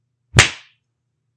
wet thwack
slapping my leg with a wet towel
there's bit of a snap in there
whack, slap